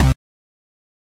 Synth Bass 029
A collection of Samples, sampled from the Nord Lead.